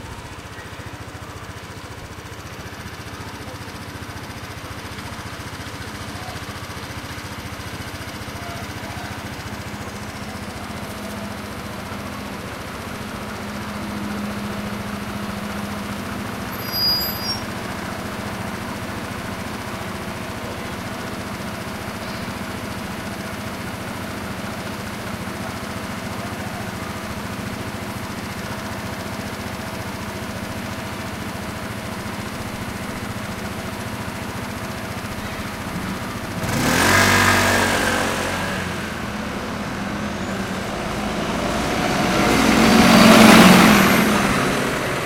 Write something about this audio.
20211010 PlaçaCentreCivicCarrer Humans Traffic Noisy Annoying
Urban Ambience Recording at the square in front of the Baró de Viver Centre Cívic, by Caracas Street, Barcelona, October 2021. Using a Zoom H-1 Recorder.
Annoying, Humans, Noisy, Traffic